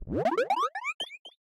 A bubble filtered build